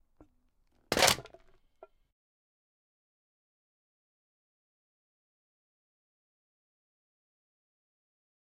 OWI CanCrush
drink can being crushed
can, crumple, crunch, crush